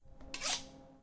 Afilando uno
That's a knive being sharpened one time. Recorded with a Zoom H2.